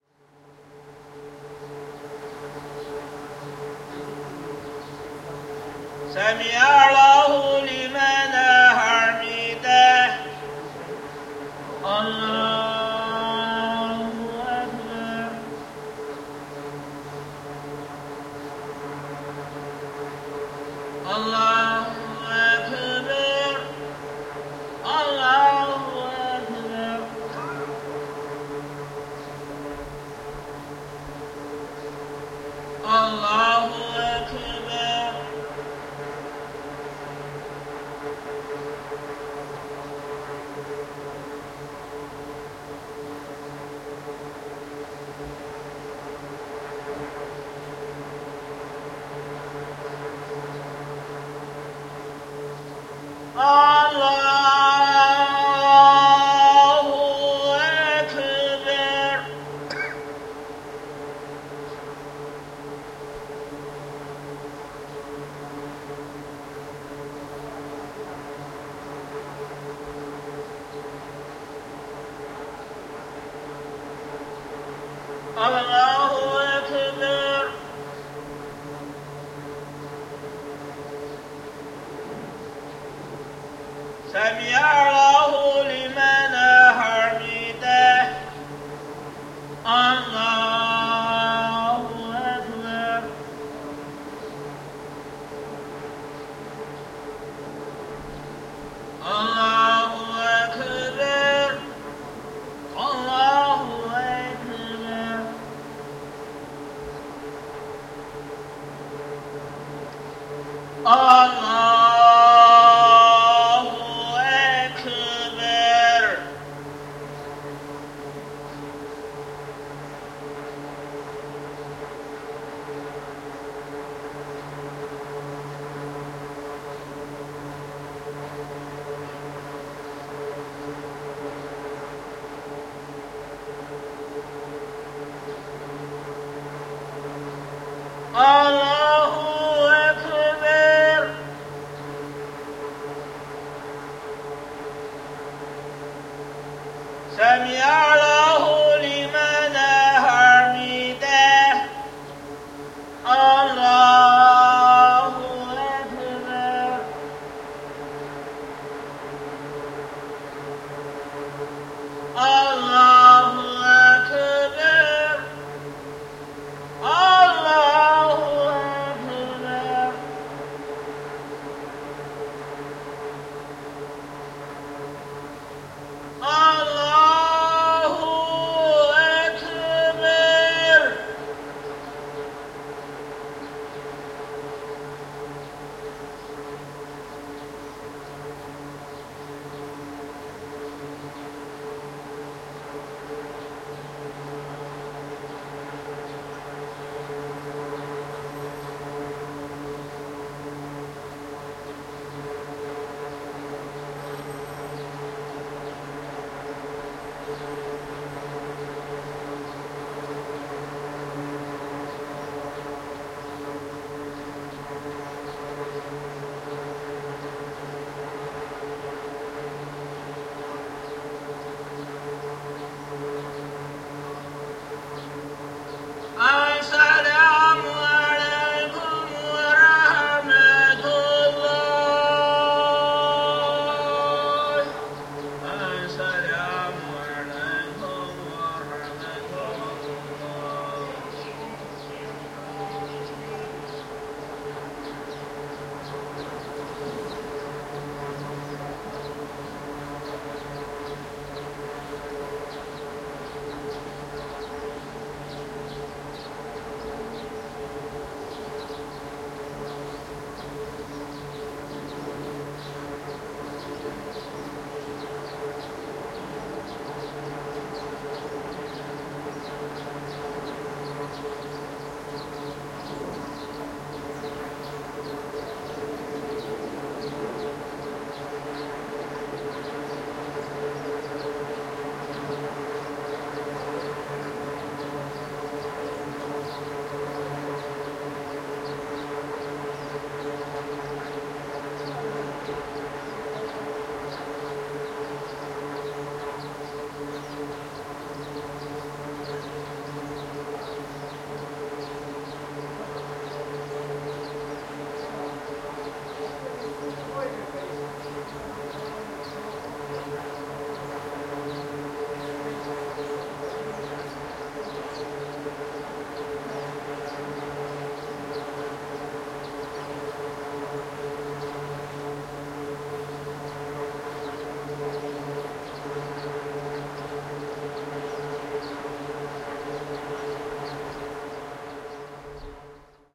Muslim pray in Chinese mosque in Xi'an
Muslim pray in the great mosque of Xi'an, in China (August 2018).
The mosque is used by the Hui people, the muslim Chinese community.
This is the field-recording of the pray from outside the praying hall. The pray was amplified by some speakers and the background drone sound was produced by an array of misting fans used to cool the ambient from the intense heat.
prayer, field-recording, pray, arabic, religion, muslim, xian, great